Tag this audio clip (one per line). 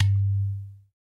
indian
percussion
udu